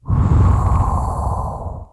Blast + Flamethrower cooldown
A blast and cooldown sound of a flamethrower
blast, fire, flame, flamethrower, launch, thrower